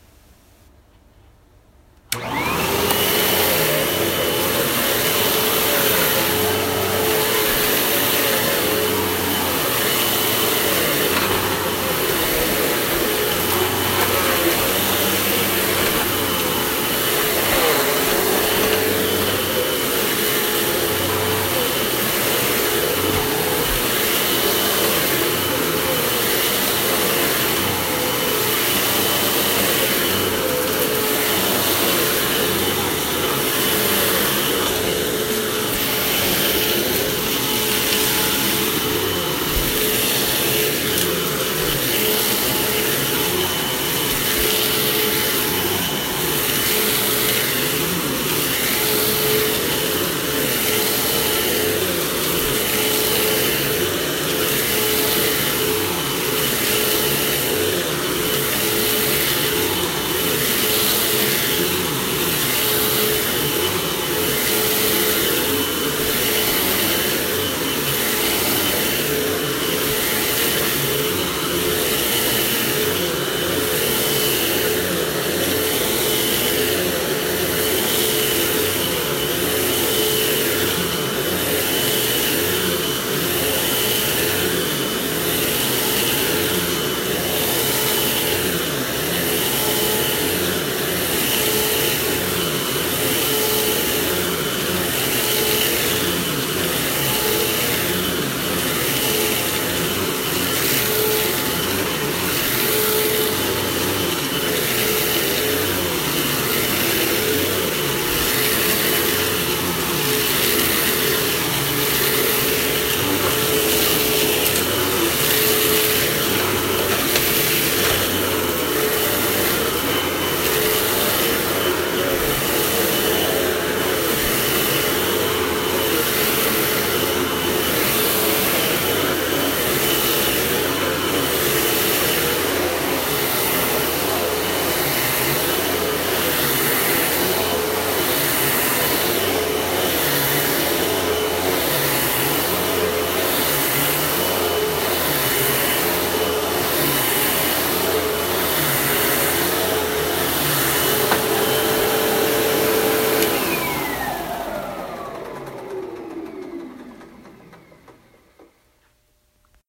Vacuum cleaner noise, including start and stop
vacuum-cleaner, cleaning, vacuum